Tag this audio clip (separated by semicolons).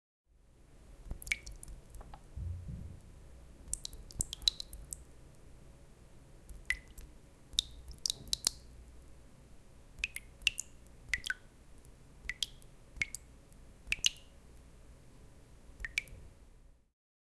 Czech; Pansk; Panska